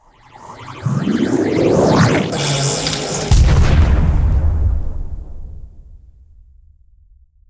HITS & DRONES 20
Fx, broadcasting